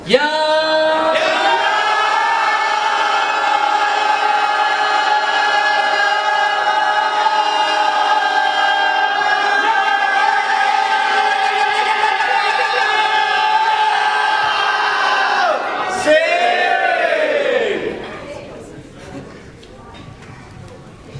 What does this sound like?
"Yam Seng" is what people shout at Chinese weddings in Singapore, it's a toast, only noisier.